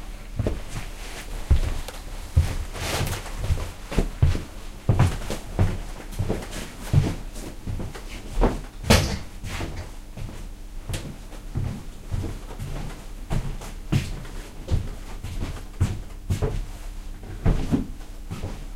footsteps on wooden floor
Me walking on a wooden floor. It sounds a bit hollow. Recorded with a Sony HI-MD walkman MZ-NH1 minidisc recorder and two Shure WL183.